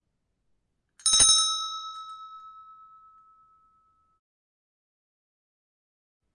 Bell ringing once
A small bronze bell rings once.
bell, bronze, chime, clinging, ding, ring